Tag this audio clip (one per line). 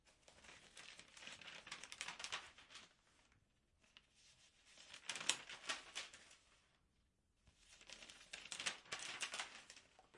paper
turning
pages
turn
folding
newspaper